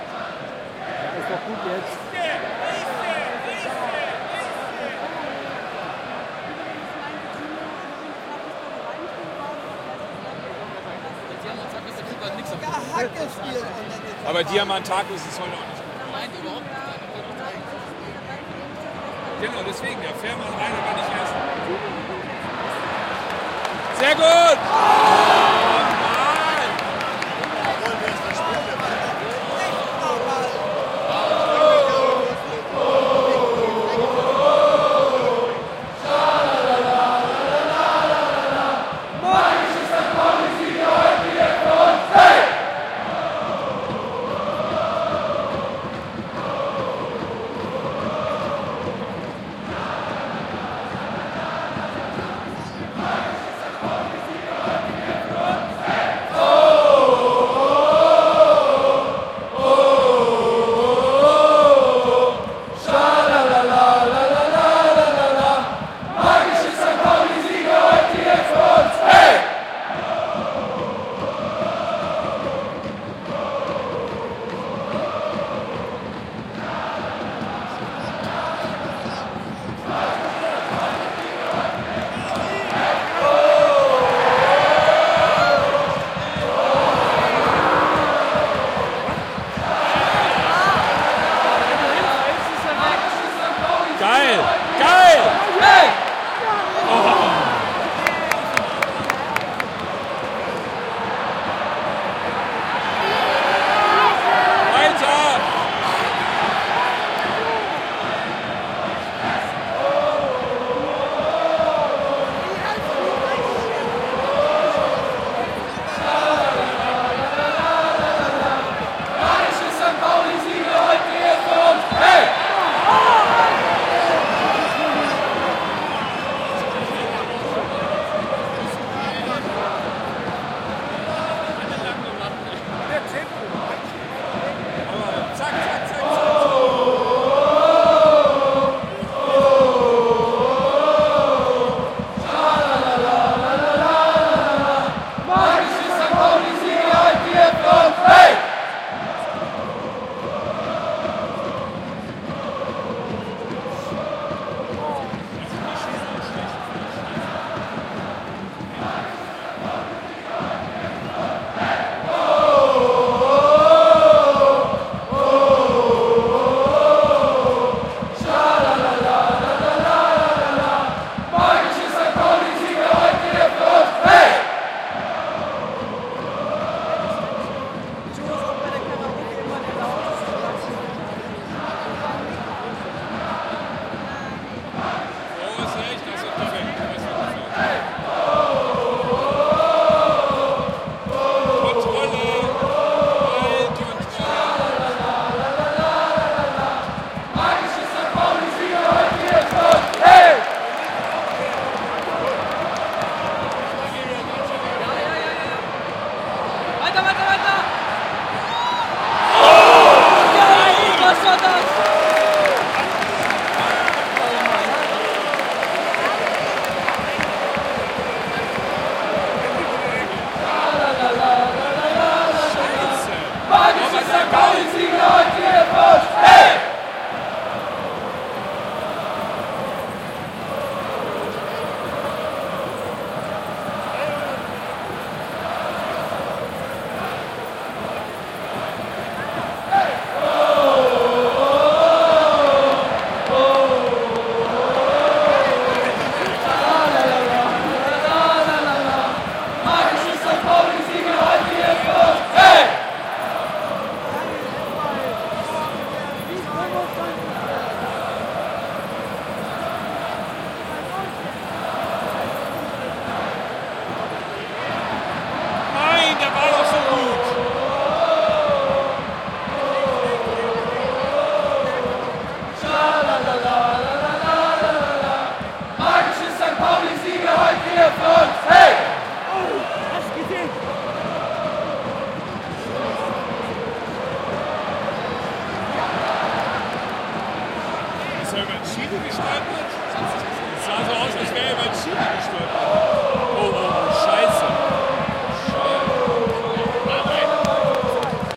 Soccer Stadium game FCSP vs. Bochum
Recording of a soccer game between FC St. Pauli and VFL Bochum at 8th November 2019 at Millerntor Stadium in Hamburg. Recorded with Shure MV88+ in stereo mode.
game, Soccer, people, FC, Cheers, FCSP, play, Hamburg, Pauli, Bochum, Stadium, St, Millerntor